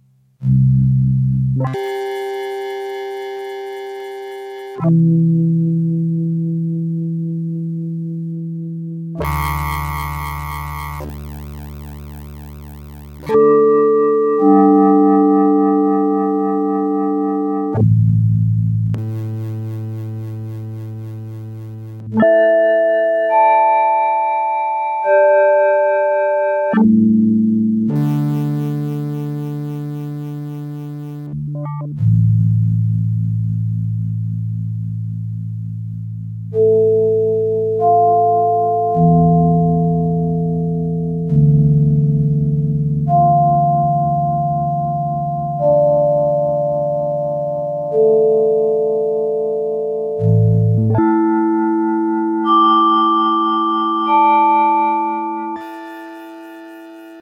Yamaha PSS-370 - Sounds Row 4 - 11
Recordings of a Yamaha PSS-370 keyboard with built-in FM-synthesizer
Yamaha, Keyboard, PSS-370, FM-synthesizer